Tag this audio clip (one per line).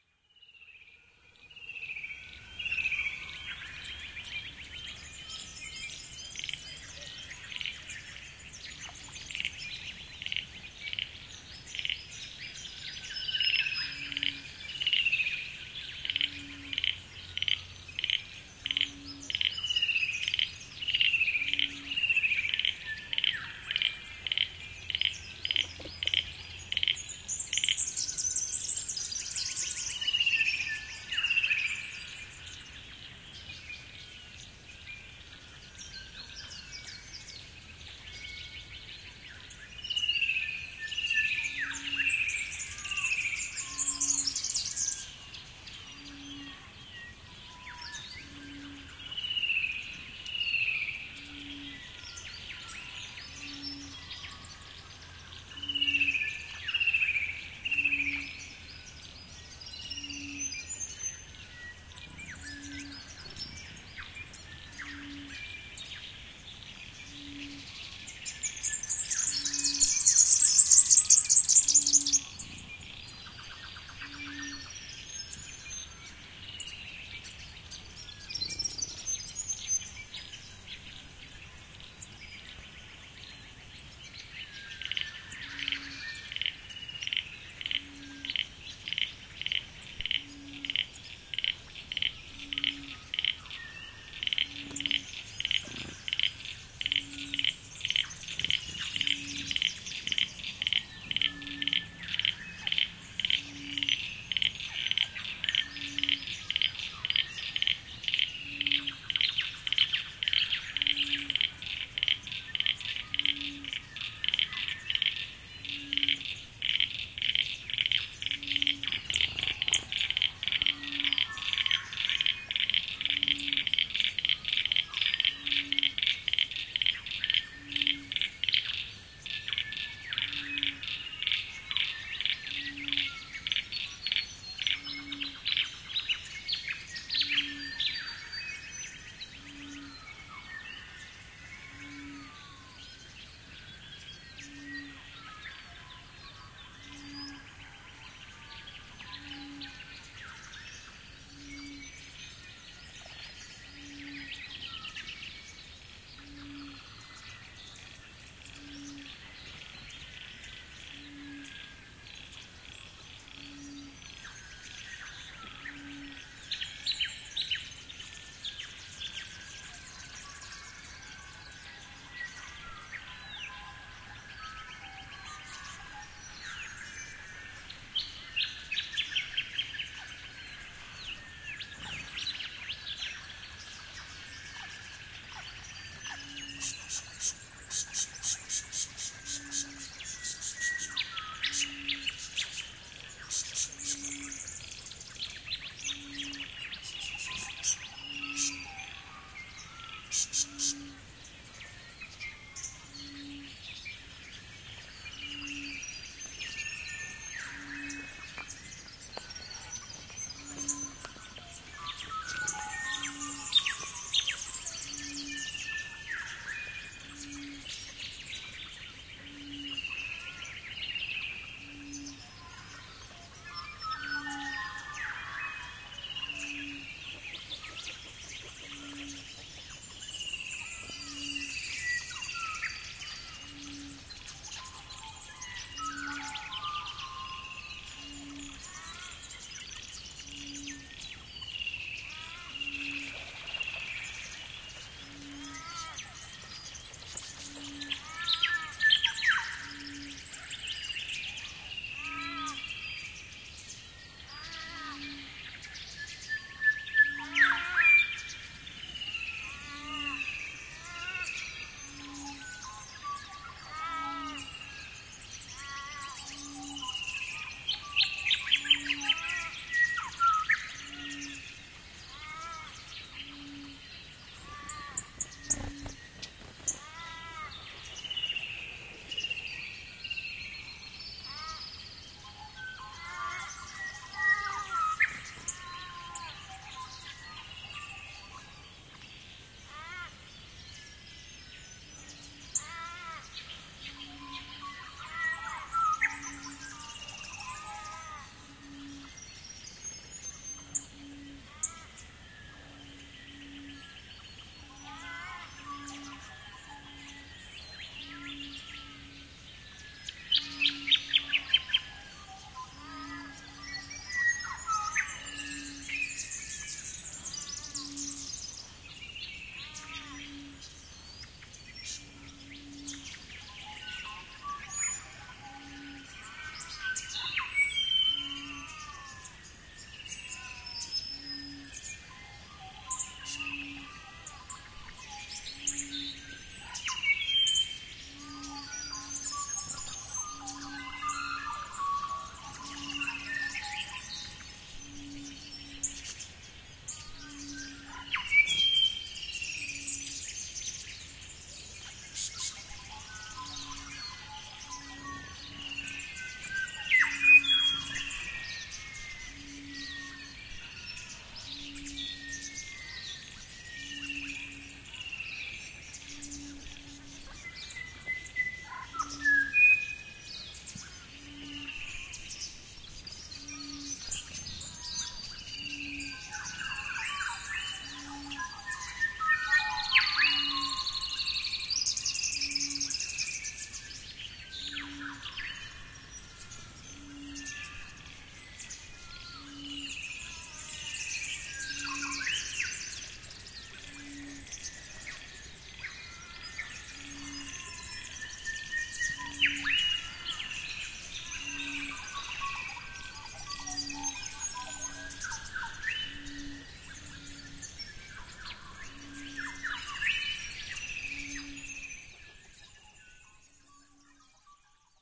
magpie-warble
raven
magpie
atmos
insects
australian
atmosphere
crow
dawn-chorus
nature
dawn
Australia
frogs
birds
field-recording
bird